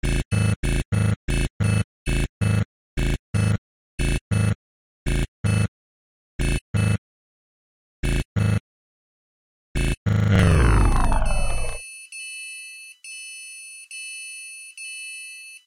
heart beat for game